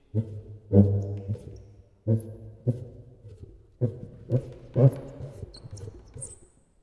The putipù is a percussion instrument used in Neapolitan folk music and, generally speaking in the folk music of much of southern Italy. (An alternative name is "caccavella".) The name putipù is onomatopoeia for the "burping" sound the instrument makes when played. The instrument consists of a membrane stretched across a resonating chamber, like a drum. Instead of the membrane being stuck, however, a handle is used to compress air rhythmically within the chamber. The air then spurts audibly out of the not-quite-hermetic seal that fastens the membrane to the clay or metal body of the instrument.
clay, percussion, caccavella